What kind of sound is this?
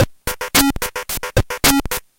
A beat constructed out of nothin but the LSDJ pulse channel, with faked PWM thanks to the use of macros
gameboy, loop, nintendo, electronic, pulse